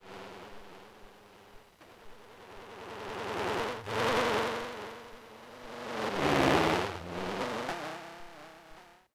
Electromagnetic antenna sound
This is an electromagnetic sound of an UbiCA Lab (UPF) robot antenna. This robot is able to take inventory of a shop without the need of any prior knowledge and with the minimal set of resources.